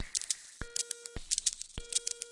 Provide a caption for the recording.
Static Loop II
Easily loop-able, but not perfect static glitches.
bend, bending, bent, circuit, circuitry, glitch, idm, noise, sleep-drone, squeaky, strange, tweak